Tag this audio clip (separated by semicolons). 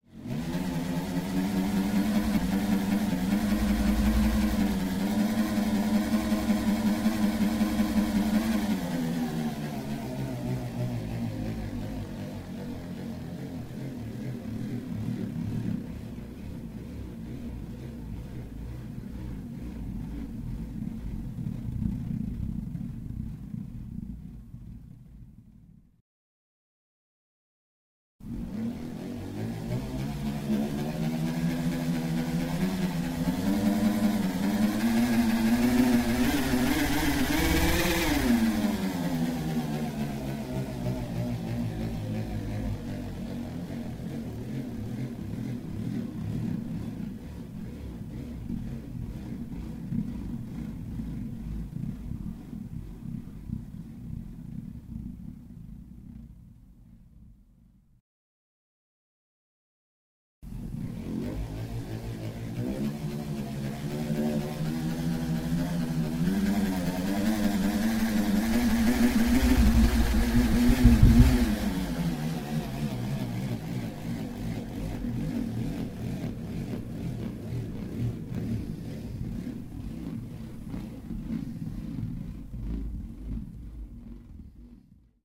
rotation; start; power-down; electric; rev; sci-fi; machine; revolution; power; power-up; spaceship; motor; engine; whiz; speed-up; stop; space; zip